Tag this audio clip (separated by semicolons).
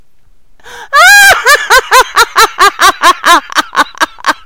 female; giggle; laugh; laughing; laughter; woman